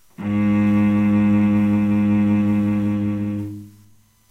9 cello G#2 Ab2
A real cello playing the note, G#2 or Ab2 (2nd octave on a keyboard). Ninth note in a C chromatic scale. All notes in the scale are available in this pack. Notes played by a real cello can be used in editing software to make your own music.
Ab, A-flat, cello, G-sharp, instrument, scale, string, stringed-instrument, violoncello